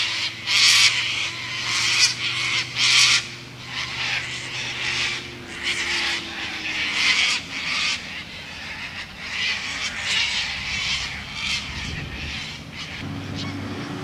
About 15 seconds of a large flock of cockatoos flying overhead and cawing while (trying) to interview people in Mapoon, Queensland.